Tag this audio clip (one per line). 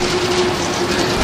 field-recording
loop
monophonic
ocean-city